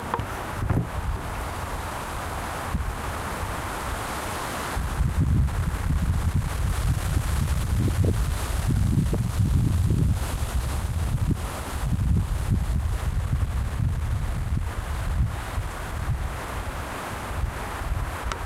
!rm wind distortion
recorded the wind blowing through the leaves with my zoom h4n hand held recorder. then processed in Ableton live 9
trees, wind, distortion